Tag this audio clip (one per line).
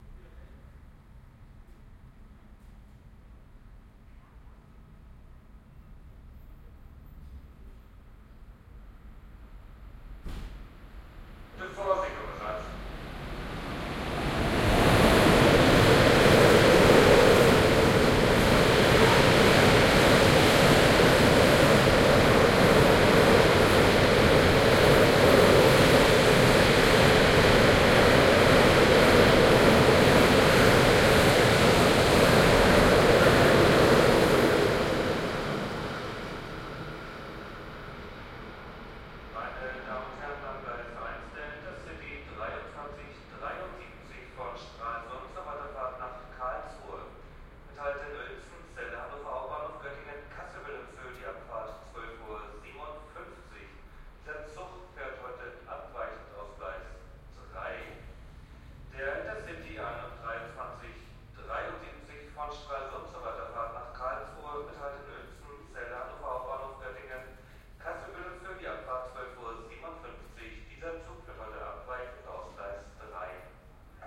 field-recording
binaural